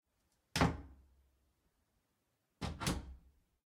Bathroom Door open close-003
Hotel Room bathroom door open/close
Bathroom, Close, Door, Hotel, Open